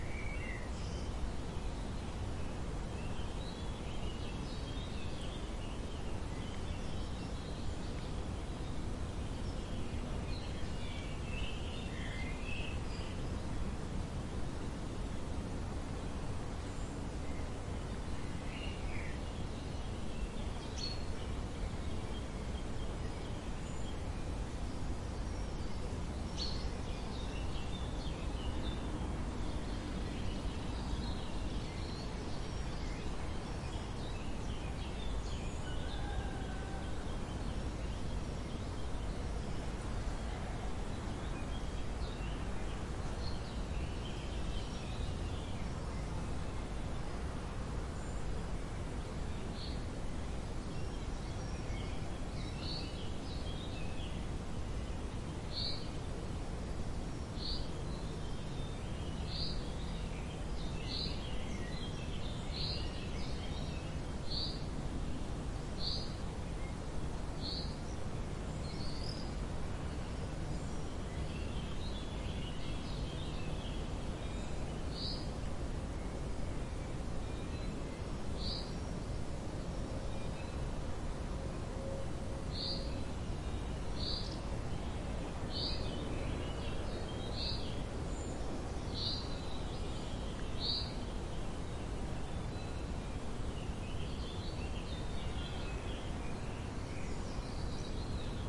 140809 Neuenburg Barbacane Morning R
Early morning in the barbacane of Neuenburg Castle, located above the German town of Freyburg on Unstrut.
Birds are singing, some light traffic and bells ringing from the town can be heard in the distance.
These are the REAR channels of a 4ch surround recording.
Recording conducted with a Zoom H2, mic's set to 120° dispersion.